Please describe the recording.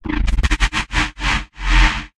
Bass FX LFO 1 [F]
bass, fx, lfo, synth, wobble